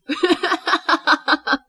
real laugh taken from narration screw ups
female, girl, laugh, voice, woman